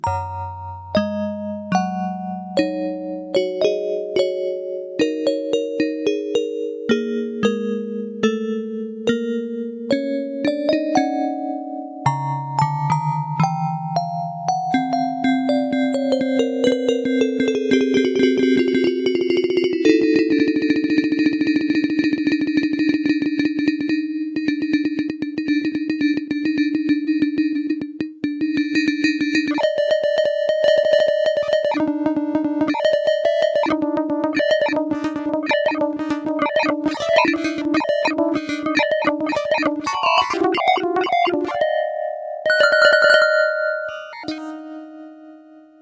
Yamaha PSS-370 - Sounds Row 4 - 18
Recordings of a Yamaha PSS-370 keyboard with built-in FM-synthesizer
Keyboard, PSS-370